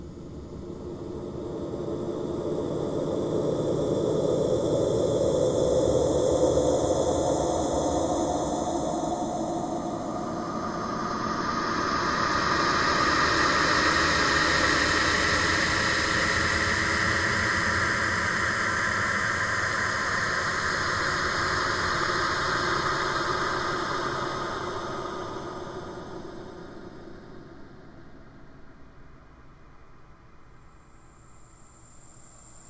Drone sounds that are Intense and scary. This screechy Sound is Terrifying!.